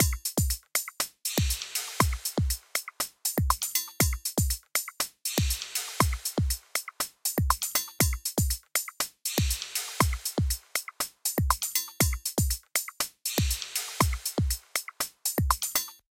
LP 1 - Atmos
Good day.
Atmospheric/Industrial loop. Without compression.
Support project using